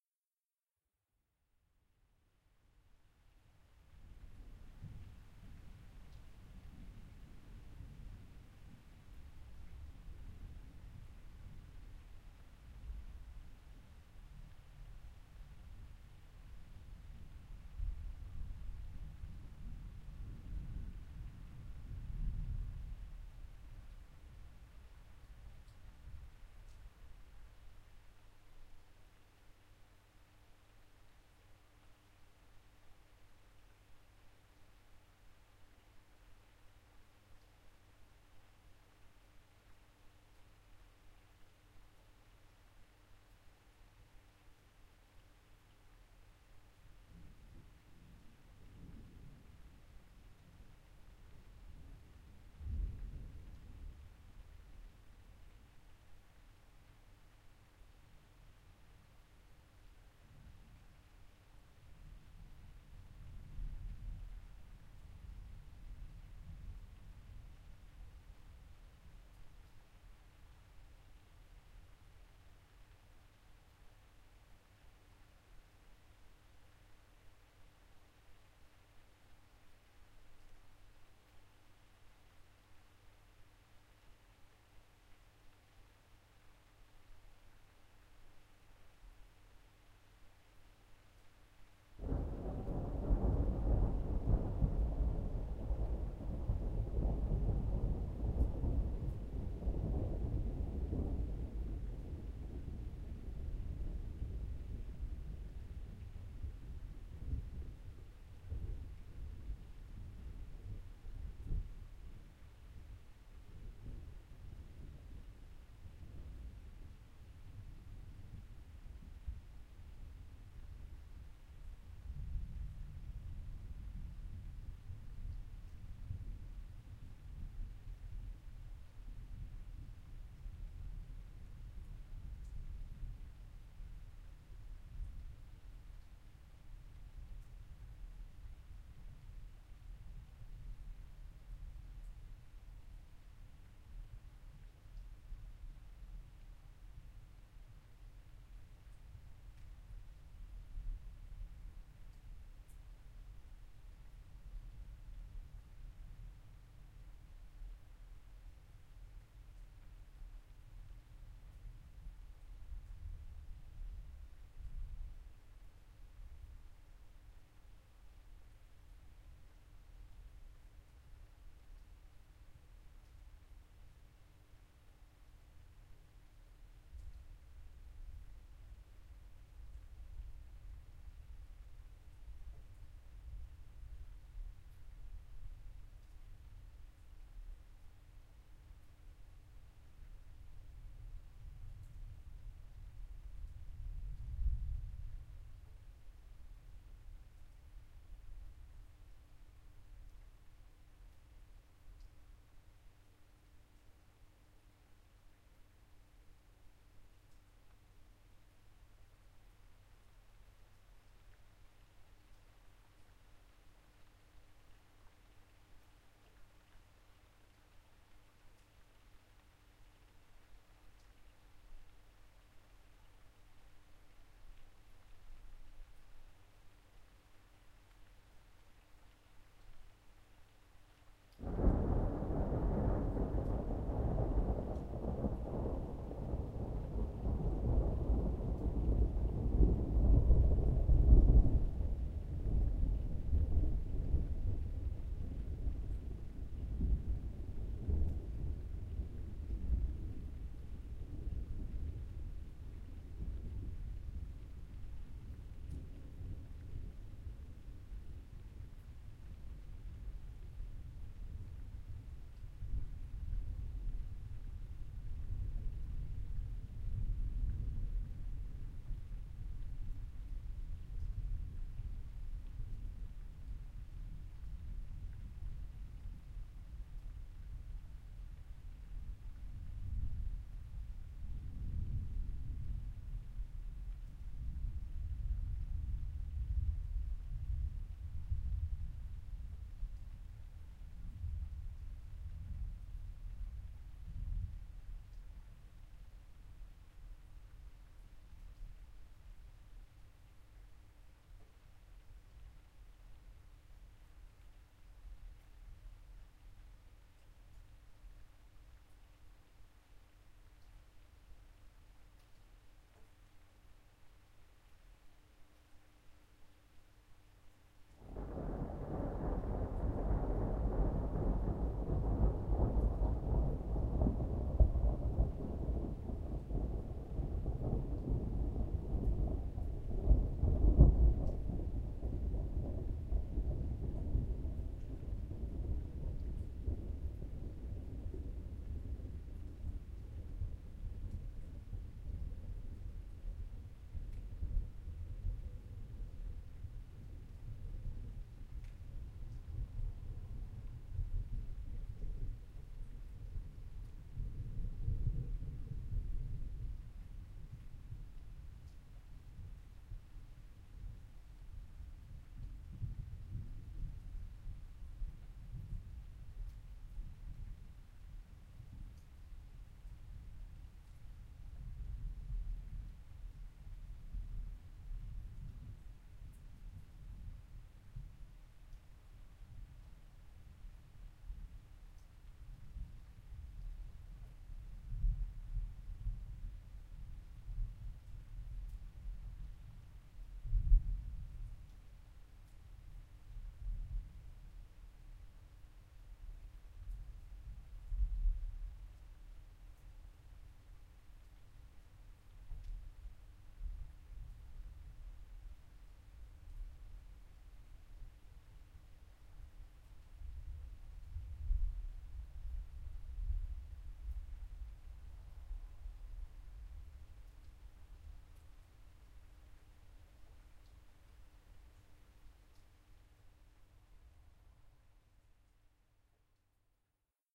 Distant thunder rumbling recorded in Southampton, UK
Zoom H1 internal mics